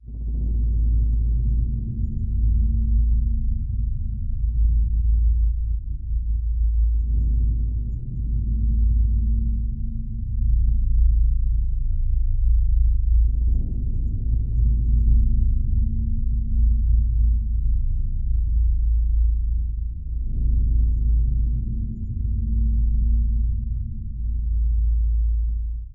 Using a low frequency loop that I made and the following three sounds, I created a sound effect for an ever-present portal in the sky for the web series Once Upon a Time in the 1970s. Season 2 in production now!
181420 - old dot matrix printer
34186 - deep bass rumble
34012 - cinematic deep bass rumble